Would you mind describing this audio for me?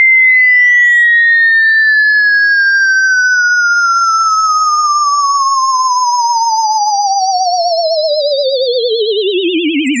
Generated with Cool Edit 96. Sounds like a ufo taking off. Frequency actually C6 I believe. Accidentally overwrote file names... oops.
tone, mono, ufo, multisample